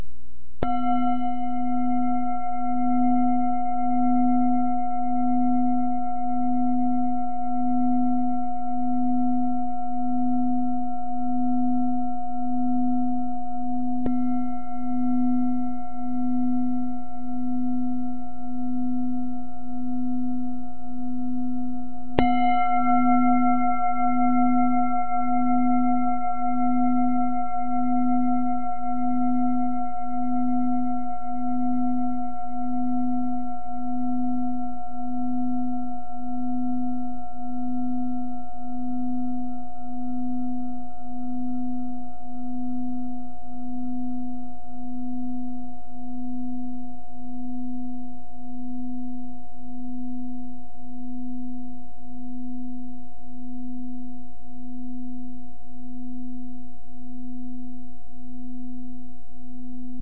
This is the sound of a brass "singing bowl" being struck by a soft mallet.
bowl, gong, singing